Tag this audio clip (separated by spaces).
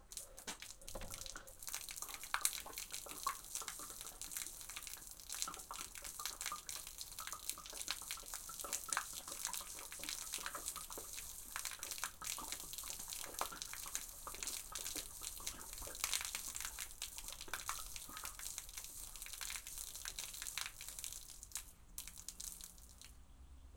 Liquid concrete glug